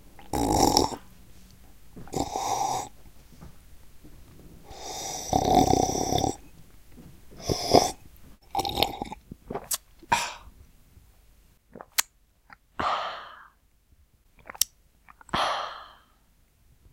Slurp Sup Sip
Me and my friend sipping and "ah"ing.
Recorded with Zoom H2. Edited with Audacity.
humidify, dink, sip, water, tea, drinking, wine, liquid, sipping, juice